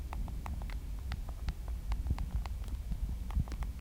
Text message being typed onto a mobile phone.